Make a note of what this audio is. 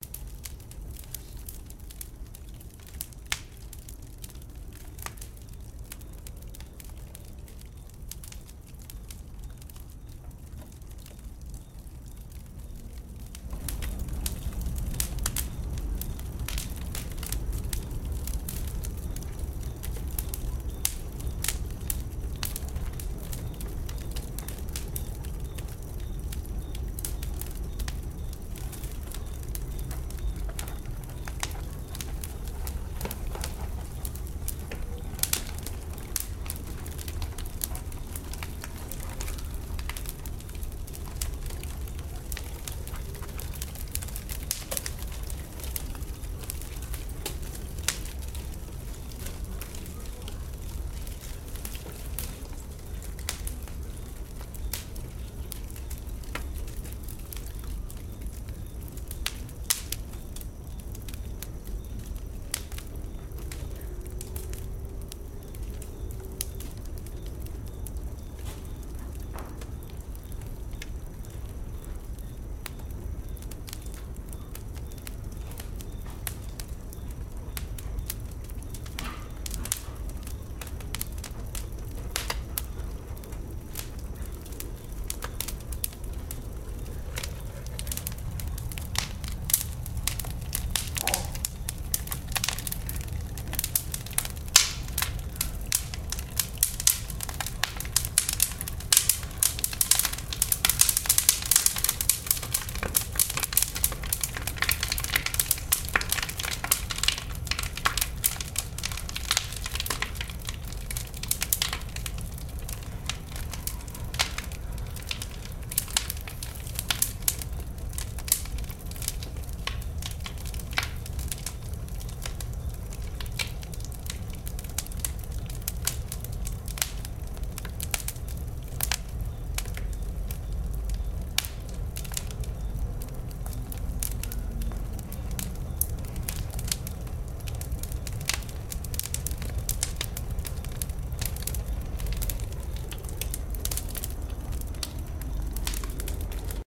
wood burning in a fire in Goiânia, Brazil